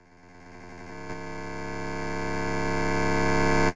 spongeous-buildup
Digital garbage, looped, gets louder.